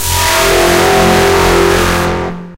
Distorted sin wave scream 5 [LOUD]
vst, hardcore, processed, noisy, flstudio, hard, sine, sfx, distortion, dark, loud, distorted, experimental, noise, gabber